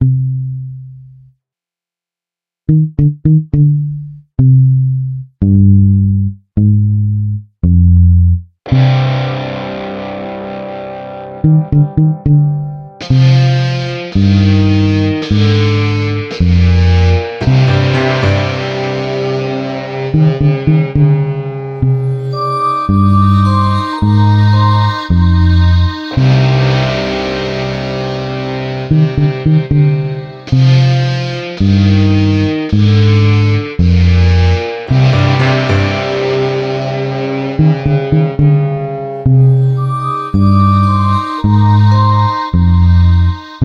Music I made in GarageBand for something called Victors Crypt. Use it if you wanna. Get a feeling this could work for something spooky and mysterious. Hope you like it!
anxious, atmos, background-sound, bogey, creepy, drama, dramatic, evil, frightful, ghost, Gothic, grisly, haunted, hell, horror, imaginair, macabre, nexpectedly, nightmare, phantom, scary, shady, sinister, spectre, spooky, suspense, terrifying, terror, thrill, weird